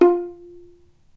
violin pizzicato "non vibrato"